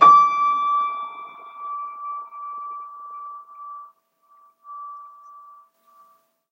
88 piano keys, long natural reverb: up to 13 seconds per note
THIS IS ME GIVING BACK
You guys saved my bacon back in the day. Recently I searched for free piano notes for a game I'm making, but the only ones I could find ended too quickly. I need long reverb! Luckily I have an old piano, so I made my own. So this is me giving back.
THIS IS AN OLD PIANO!!!
We had the piano tuned a year ago, but it is well over 60 years old, so be warned! These notes have character! If you want perfect tone, either edit them individually, generate something artificially, or buy a professional set. But if you want a piano with personality, this is for you. being an old piano, it only has 85 keys. So I created the highest 3 notes by speeding up previous notes, to make the modern standard 88 keys.
HOW THE NOTES WERE CREATED
The notes are created on an old (well over 50 years) Steinhoff upright piano. It only has 85 keys, so I faked the highest 3 keys by taking previous keys and changing their pitch.